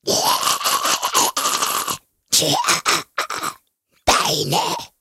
Gremlin laugh
Impression of the typical Gremlins laugh
monster, gremlin, laugh, horror, gremlins, laughing